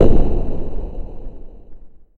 Drum / thud (movie-trailer jump-scene style)
Drum sound from Hydrogen (open source program) - pitch dropped and reverb added in Audacity.
drum, jump-scene, movie-trailer, thud